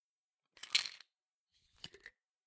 opening the cap on a bottle of pills